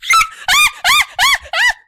panic squeaks
WARNING: LOUD
panicking while recording a let's play
female, panic, scream, squeak, yell